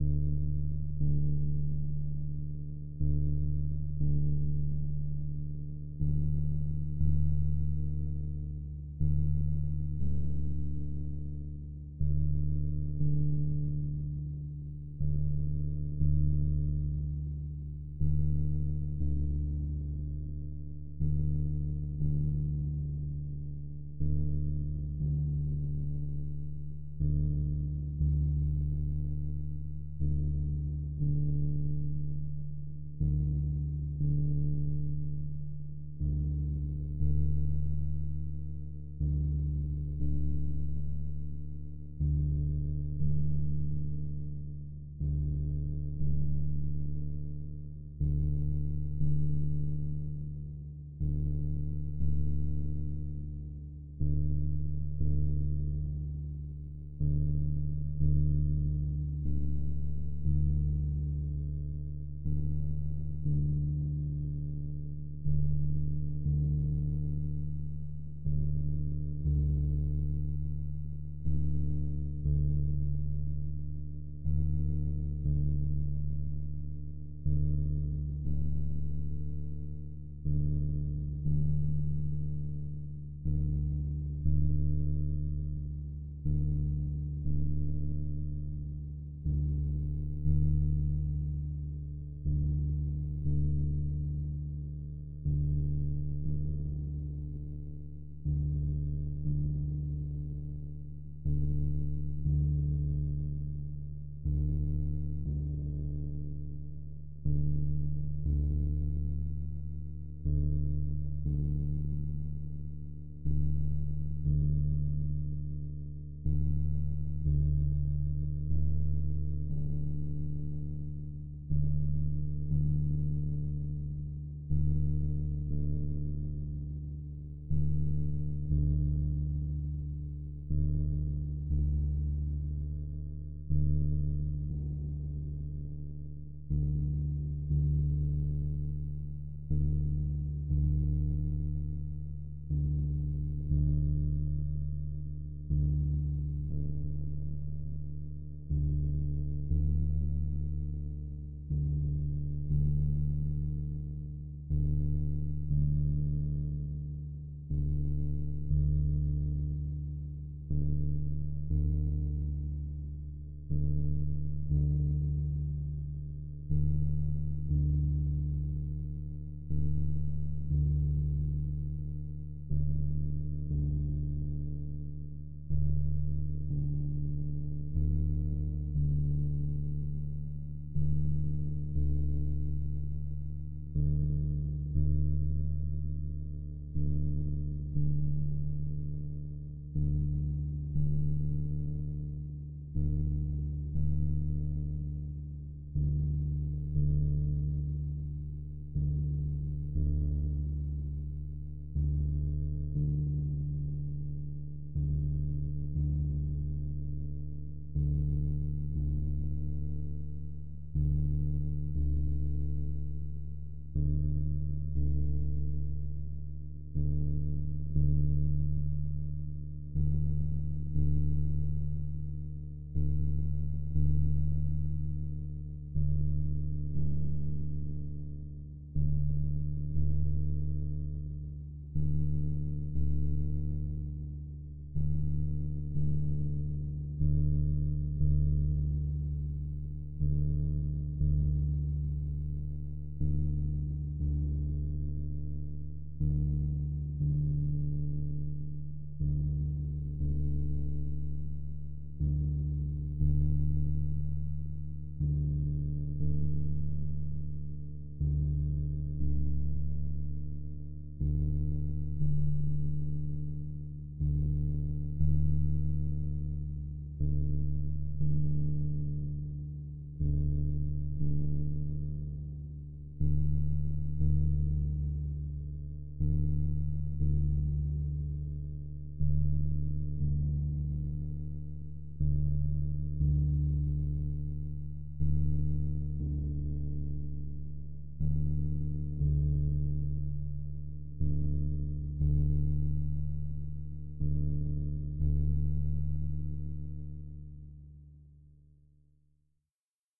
Ambience for a musical soundscape for a production of Antigone